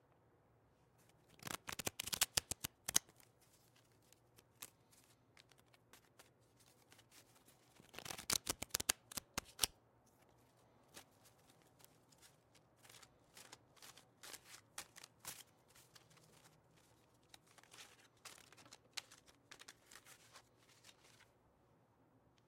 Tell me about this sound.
Shuffling cards
cards being shuffled
being, cards, shuffled